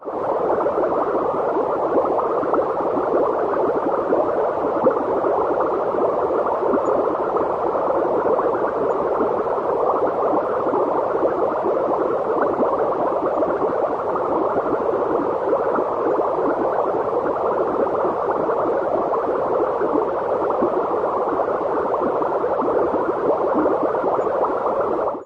made by supercollider